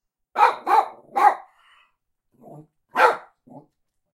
Barking Dog 2
Jack Russell Dog trying to bite something.
Jack-russell, animal, attack, attacking, barking, bite, biting, dog, fight, fighting, lurching, woof, woofing